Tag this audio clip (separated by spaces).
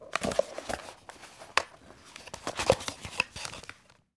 object box recording unprocessed